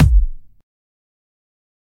these are drum sounds and some fx and percussions made with zynaddsubfx / zyn-fusion open source synth with some processing mostly eq and compression
drum, drums, drum-synthesis, hit, kicks, one-shot, percs, percussion, percussive, sample, single, snares, synthesis, synthetic, zyn, zynaddsubfx, zyn-fusion